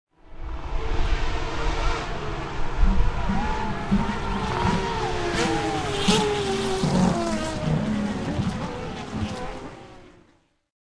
TC Race at Balcarce, Argentina. Cars came down-shifting to 1rst turn, engine exploding , and go accelerating to back-straight. Recorded with ZoomH4, LowGain